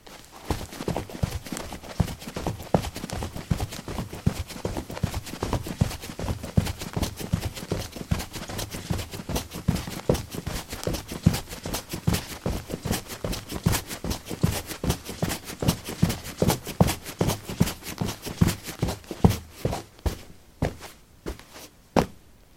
Running on carpet: dark shoes. Recorded with a ZOOM H2 in a basement of a house, normalized with Audacity.